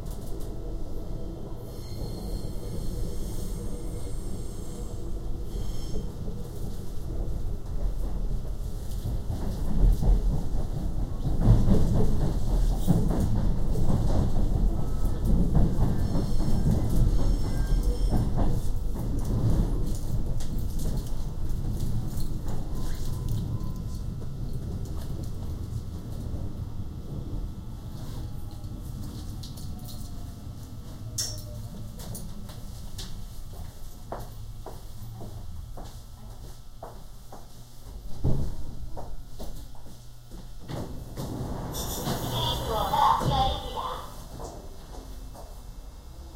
0003 Metro arrives footsteps
Metro arrives to the station, the doors open, information about doors open in Korean. Footsteps. From inside.
20120112